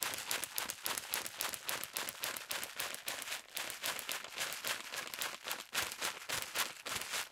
A series made with domestic ingredients (!)mostly by pouring rice, beans and lentils and peppercorns into various containers and shaking them
shake, rattle, rhythm